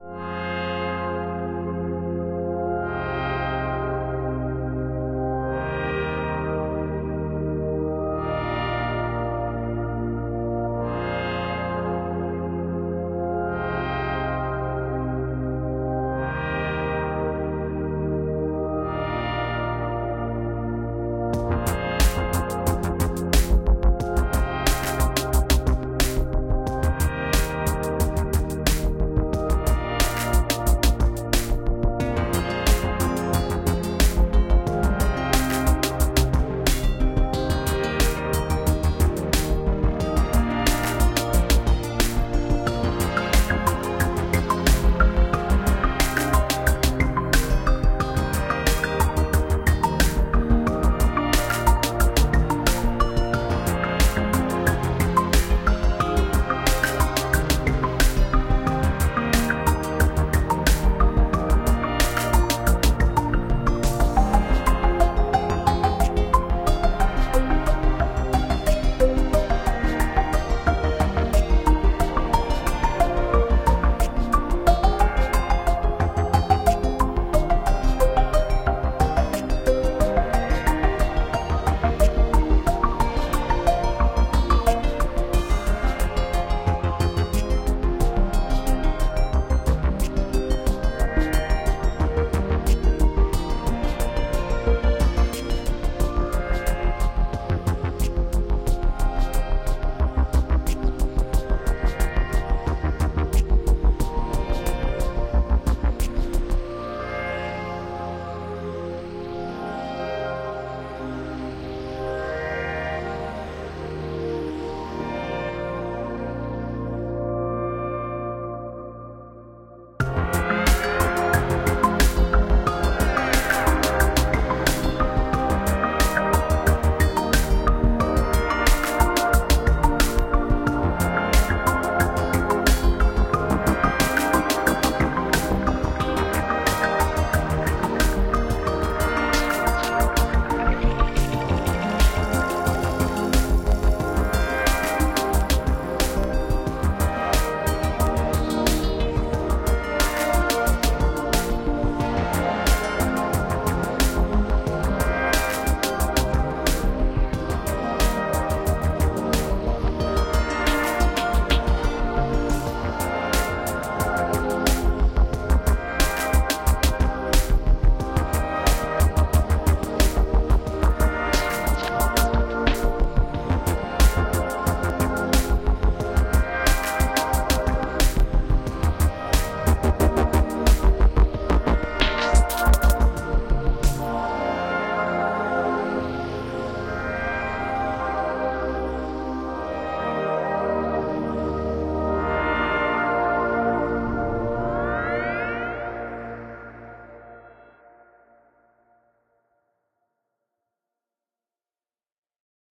BCO - City view from Torni
Drifting ambient pads with melodic synths and driving bass.
bass, synthpop, melodic, electronic, synthwave, synth, idm, drums, electronica